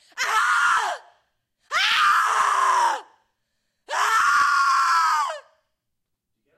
psycho screams 2
Woman screams in a state of pain delusion or psychosis
Sony ECM-99 stereo microphone to SonyMD (MZ-N707)